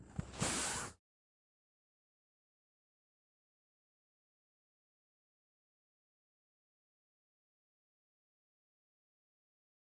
Roce de sombrero
dialogue, audio